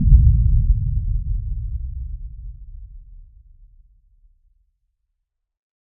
ExplosionBombBlastDistantMuffled LikeCinematicBoom 4 48k32bit
Lots of lows, perhaps inaudible on small speakers. Not quite so "ringy" or gong-like as the original Cinematic Boom, and perhaps better because of that fact. This one has some frequency shaping, especially at the very beginning (first 80 ms) to give a subtle feeling of impact, and has been reverberated for a very smooth decay. Created within Cool Edit Pro.